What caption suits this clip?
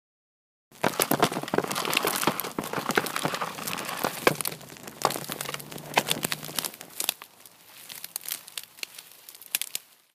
Bridge Collapse
Sequence of a bridge collapsing (obviously not real). Made with several brances and logs.
Any questions?
sequence, bridge, branches, collapse